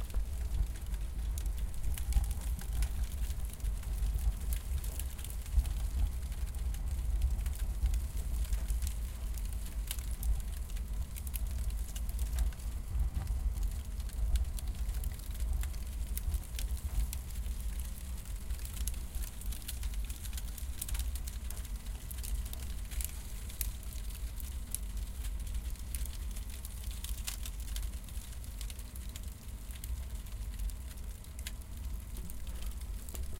Cardboard burning 1
burning, flame, match, fire, burn, cardboard